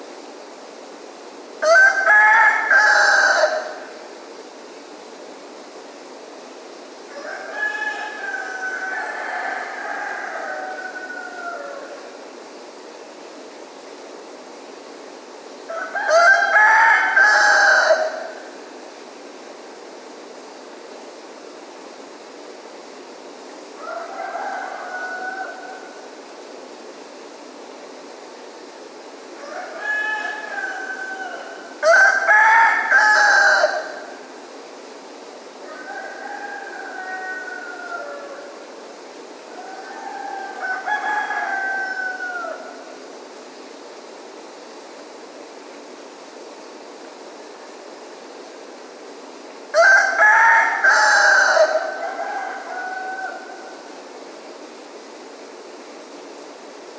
roosters ipanema dawn
Roosters crowing at 5:15 in the morning in Ipanema, Rio de Janeiro, Pavaozinho-Cantagalo Mount, May 28, 2014.
Cantagalo, dawn, field-recording, ipanema, Pavaozinho, Rio-de-Janeiro, Rode-NTG3-mic, rooster, Wendt-X3-mixer